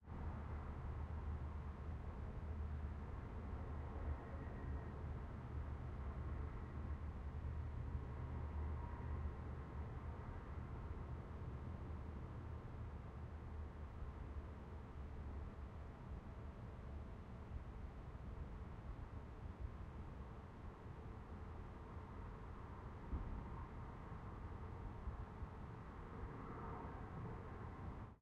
City Noise Inside Apartment
Recording of city ambience from inside apartment-building.
Processing: Gain-staging and soft high and low frequency filtering. No EQ boost or cuts anywhere else.
home, cars, Inside, soundscape, background, traffic, general-noise, street, ambient, night, ambience, city, field-recording, atmosphere, noise, Apartment, passing-by, ambiance